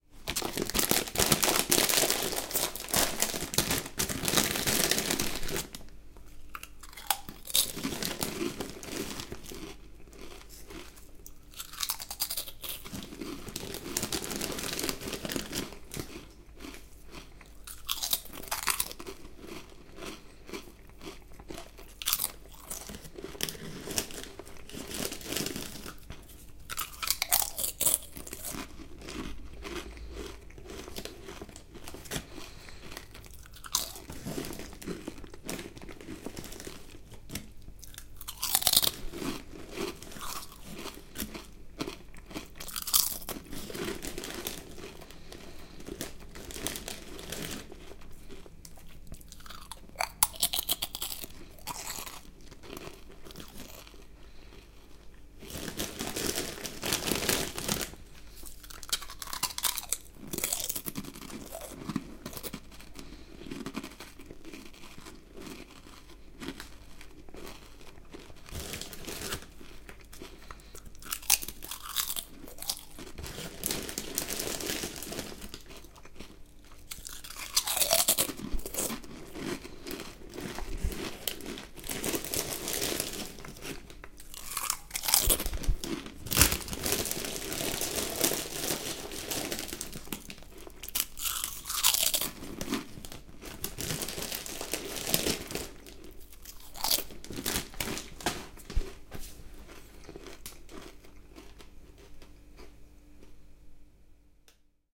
Crisps open & crunching
Open crisps and crunching.